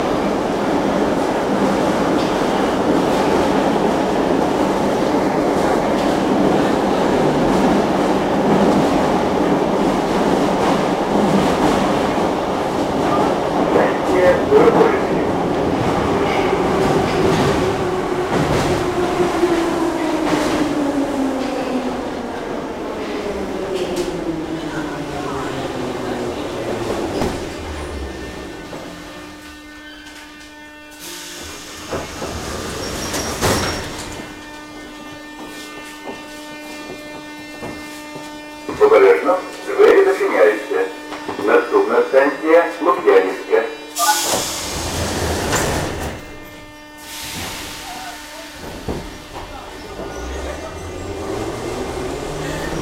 IN Train door speech Dorogozhychi-Lukjanivska
Sound recording from Kiev metro: trip in train from station "Dorogozhychi" to "Lukjanivska" with stops on them.
field-recording, in-train, kyiv, metro, train, transport, voice